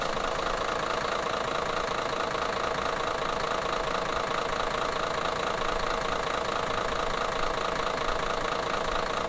bus engine running outside
During bus engine is running
bus, engine, exterior, vehicle, transportation